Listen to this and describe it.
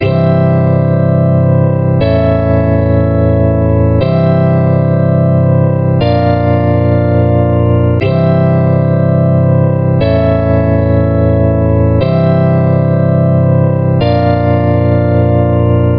Random synth loop 230 dry short loop 120 bpm
120, analog, club, electro, electronic, house, loop